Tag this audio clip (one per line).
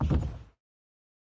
Human-Body
Pass-out
Drop-dead
Thud